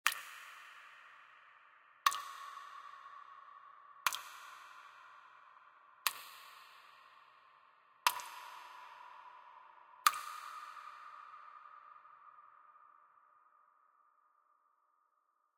Water drips in the cave HQ.
cave, caver, drip, dripping, drips, drop, drops, echo, empty, liquid, reverb, splash, trickle, underground, water, watery, wet